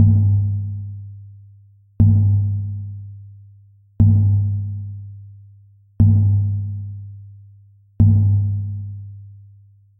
WALLAEYS Jeremy 2015 2016 Sound Atmosphere
This sound is a synthetic sound created on Audacity. To made this , I generated a Risset Drum with a frequency of 100 Hz and 2 seconds Decay. Then I created 4 repetitions of this sound, and I applied a reverberation. The reverberation create a distance effect and adds a depth to the sound.
All this can make us think of a sound atmosphere that adds suspense to a television series or movie scene for example.
Typologie (cf. P. Schaeffer):
N = continu tonique
C’est un son seul complexe
Le son est frappé, rebondi, calme.
La microstructure du son peut être associé à celui d’un tambour, un son frappé.
Le grain du son est comme rebondi. Le son ne comporte pas de vibrato.
L’attaque du son est assez violente pour ensuite s’estomper au fur et à mesure.
Le son possède des variations glissantes, serpentine sans séparation nette. Comme un soufflement ou une respiration.
Profil de Masse : Calibre
background-sound, serie, atmosphere, background, tribute, environement, suspens, ambiance